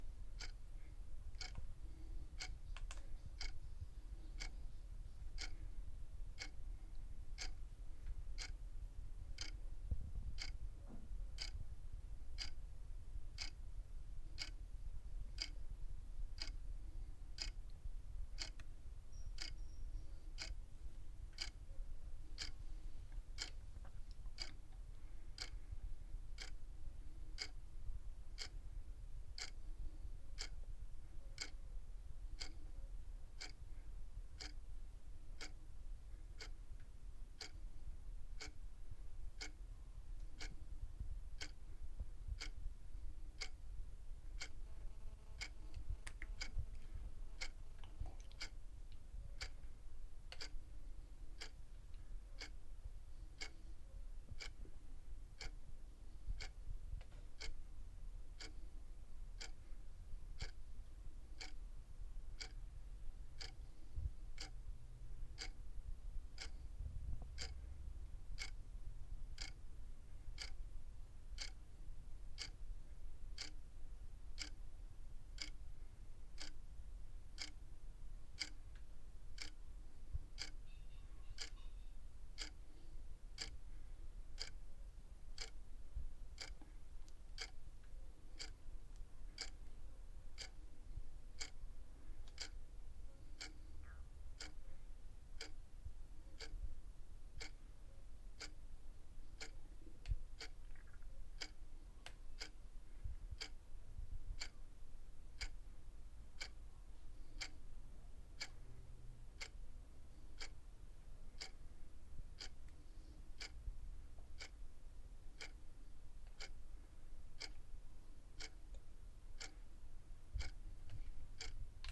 The sound of the a wall clock